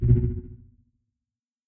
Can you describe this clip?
Robotic UI interaction. The semantic meaning could be teleport, confirmation, error, hover, interaction rejected, and so on depending on the context.
I really appreciate when you people tell how you are using the sounds.